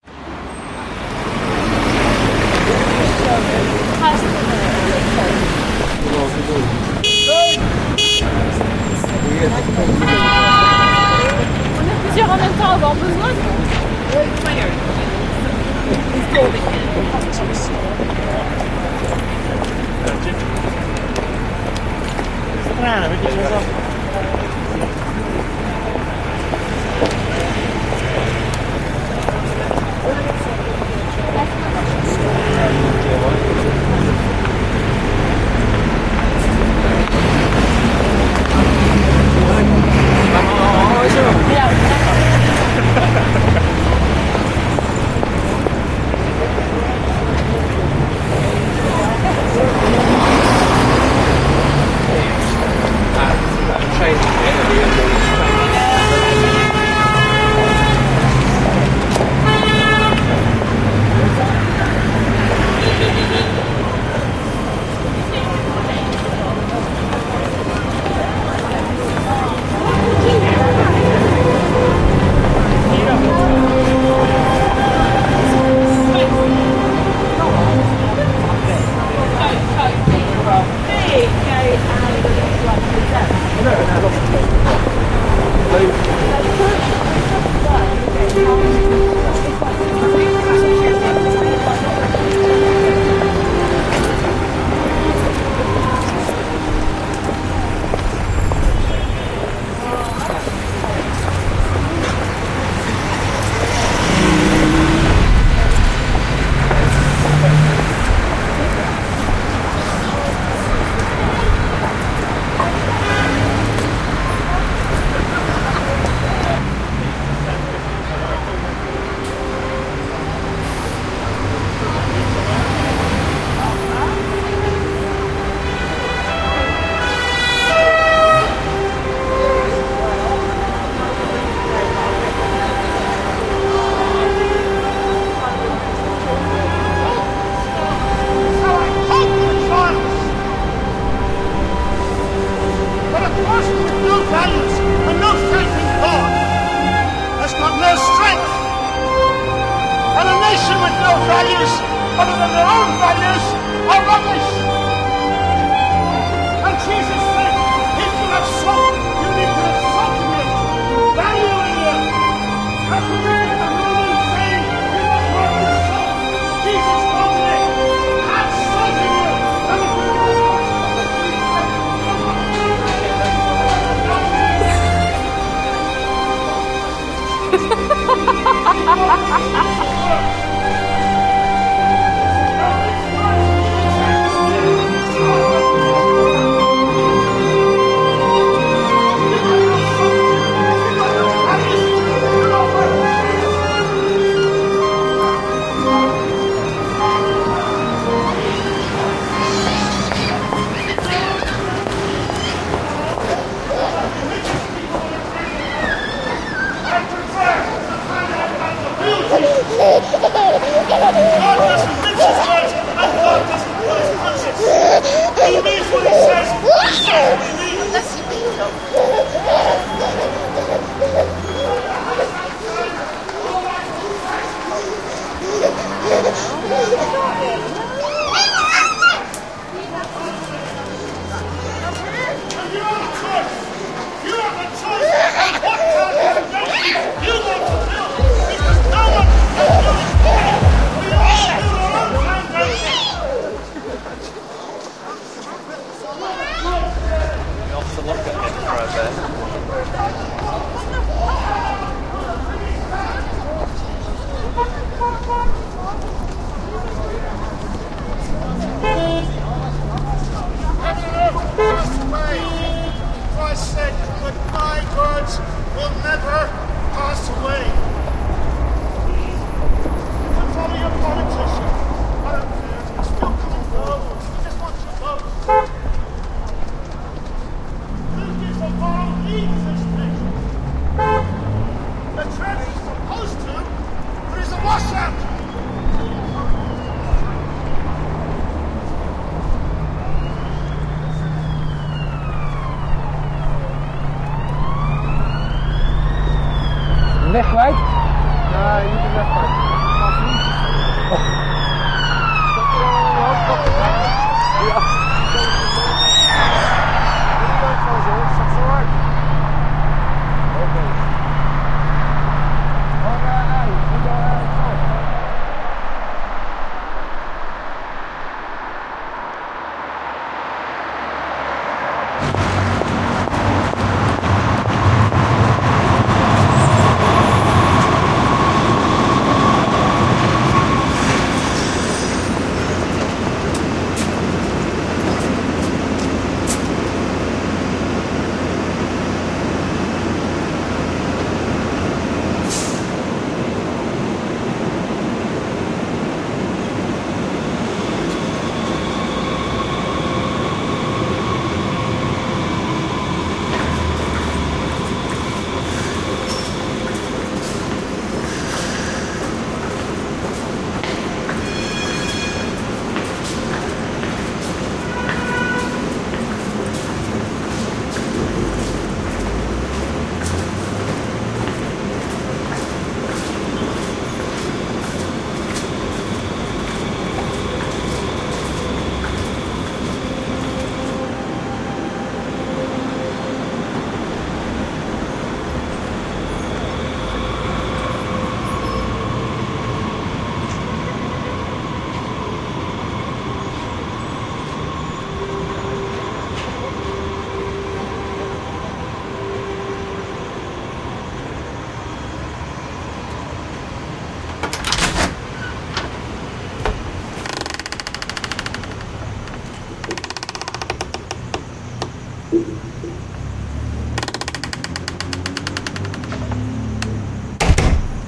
Street Noises Philip Glass Violin Concerto II
30 various sound files mixed together, produced by Stanislav Giliadov
concerto, glass, ii, noises, philip, street, violin